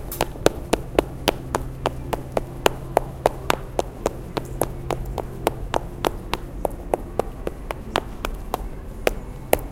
sonicsnaps EBG 4
Steps.
Field recordings from Escola Basica Gualtar (Portugal) and its surroundings, made by pupils of 8 years old.
sonic-snap
Escola-Basica-Gualtar
Escola-Basica-Gualtar, sonic-snap